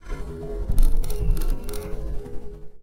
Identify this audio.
Mouse clicks slowed down